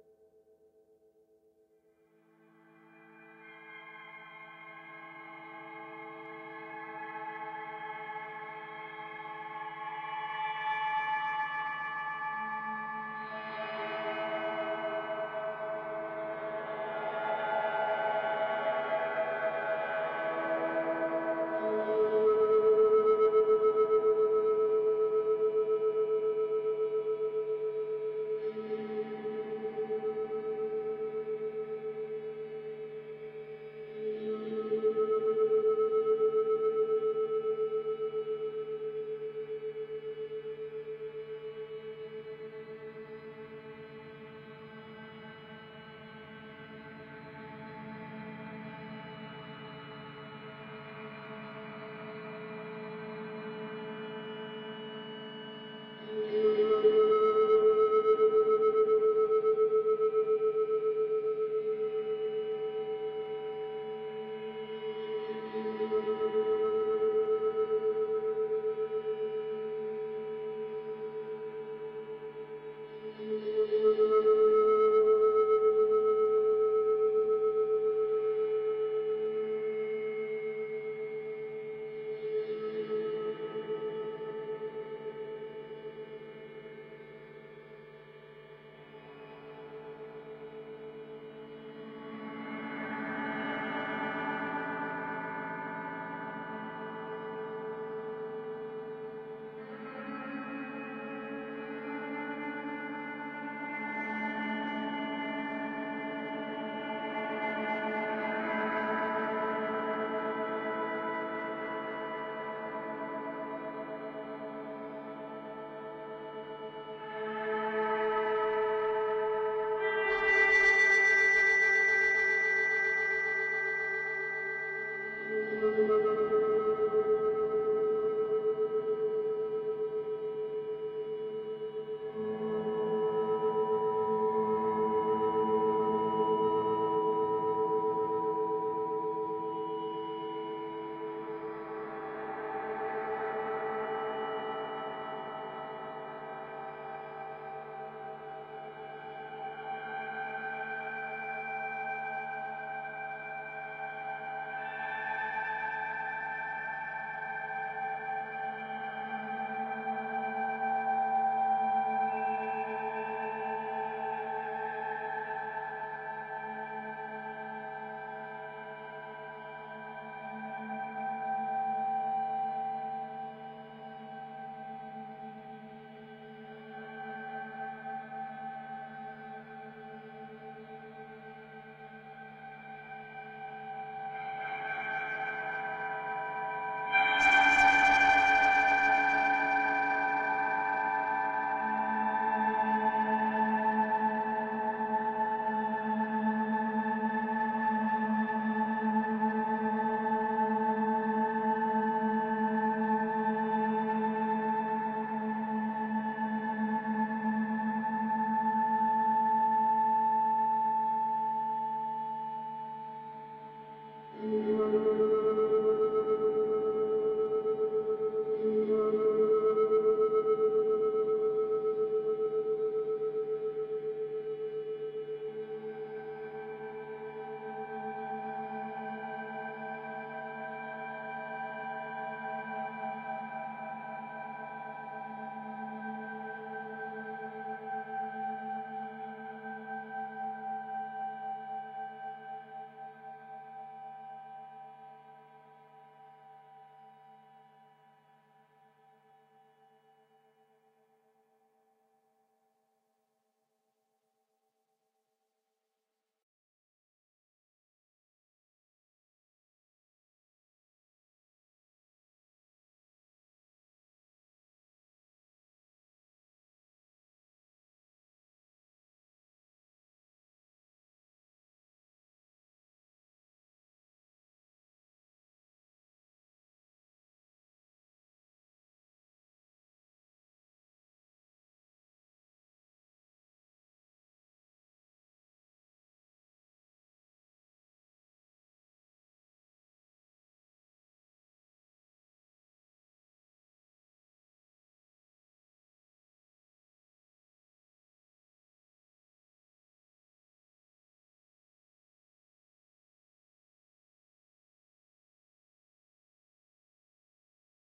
bruz treated guitar
treated electric guitar with reverb, delay and played with a handkerchief.
ambient, big-reverb, chill, cinematic, guitar, new-age, pad, slow, soundscape, treated-guitar